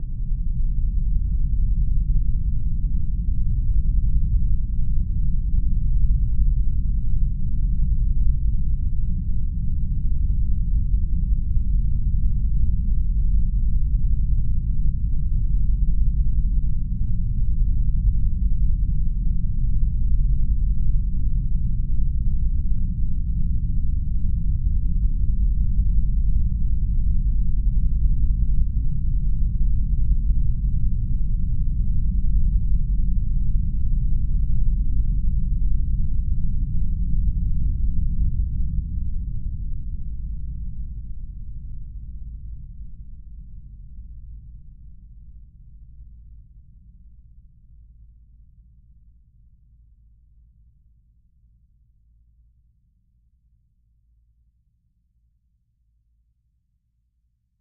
Rumble sound for many use. Use your imagination.